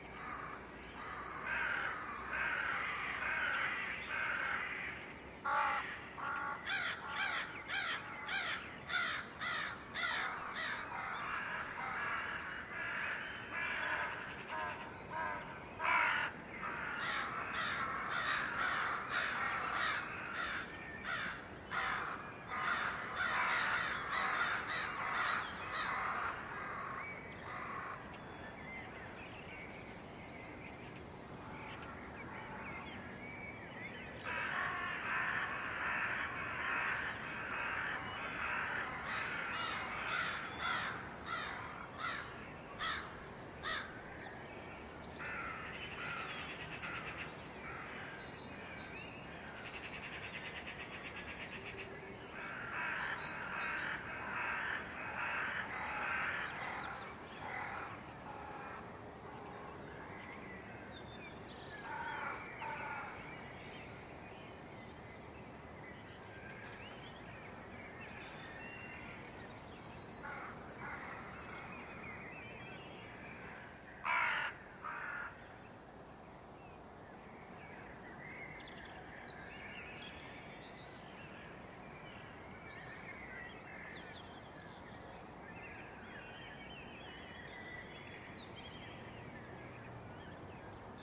Vogels soundscape1
A soundscape made with several recordings of birds out of a window in Amsterdam.
birds, crows, soundscape, magpies